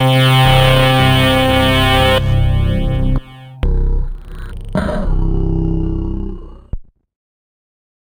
Alien Alarm: 110 BPM C2 note, strange sounding alarm. Absynth 5 sampled into Ableton, compression using PSP Compressor2 and PSP Warmer. Random presets, and very little other effects used, mostly so this sample can be re-sampled. Crazy sounds.
processed, glitch, 110, hardcore, rave, noise, electronic, dark, synth, sound, bounce, bpm, resonance, trance, acid, sci-fi, effect, dance, electro, pad, techno, glitch-hop, club, synthesizer, porn-core, atmospheric, house